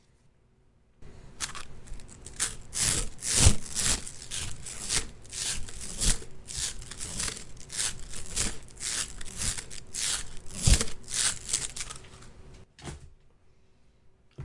Plastic slinky bounced in hand back and forth in an audio-technica mic
fx, bugs, transition, flapping, wings